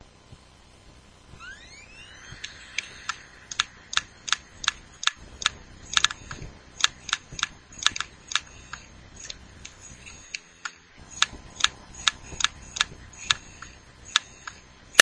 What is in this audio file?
fujitsu 60gb clicking
clicks, extended, rhythmic